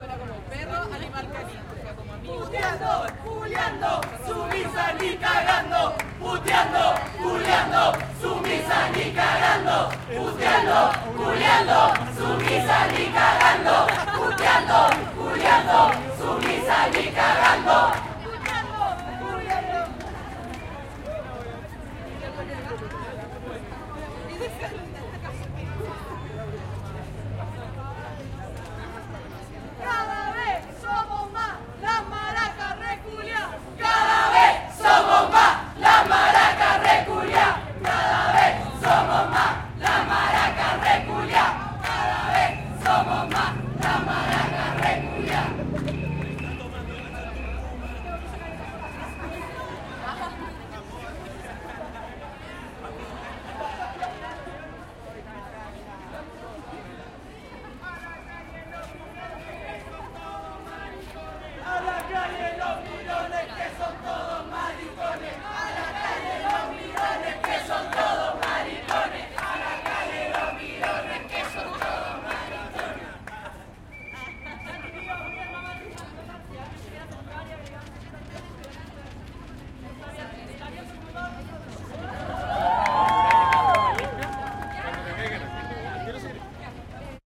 marcha de las putas y maracas 11 - mas gritos
Nunca sumisas, cada vez somos más. A la calle los mirones.
calle, chile, crowd, gritos, leonor, maracas, marcha, protest, protesta, putas, santiago, silvestri, street